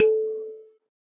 a sanza (or kalimba) multisampled